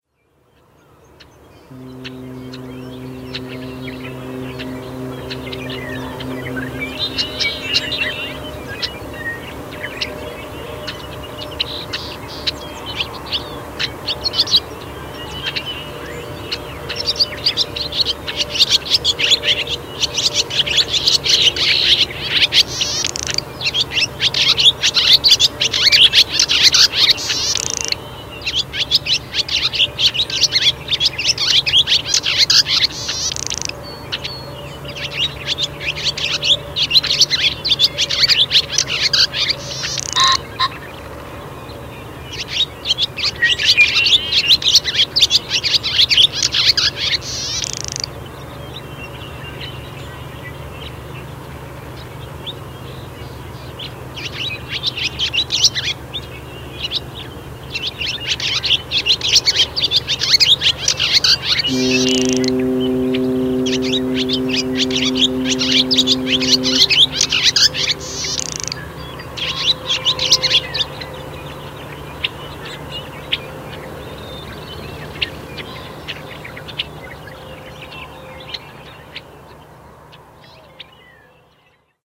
fog swallow08mar2005
violet-green-swallow, tachycineta-thalassina